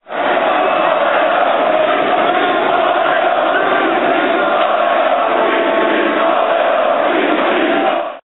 18.35 kolejorz winner2 150510
accidental documentation of spontaneous celebration of fans of the Poznan football team Lech-Kolejorz which won the Polish championship. Recordings are made by my friend from England Paul Vickers (he has used his camera) who was in the center of Poznan because of so called Annual Museums Night. It was on 15.05.2010. The celebration has placed on Old Market in Poznan.
celebraton, championship, crowd, fans, field-recording, football, football-team, lech-kolejorz, noise, poland, poznan